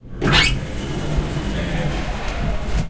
Elevator door opening. The sound is recorded from inside and we can hear at the end the noise from the bar coming in.
opening, door, elevator, UPF-CS12, campus-upf, bar